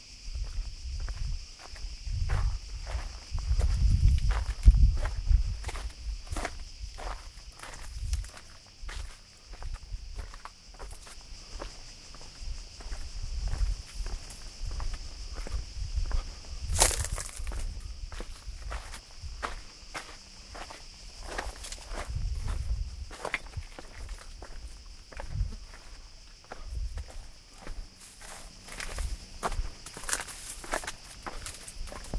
greece naxos walking
A man is walking in a small path near the Kouros of Melanes in the greek island of Naxos. The wind and a fly can be heard as well as each footsteps and the breath of the man.
greece, kouros, walking, wind, melanes, 2011, naxos, walk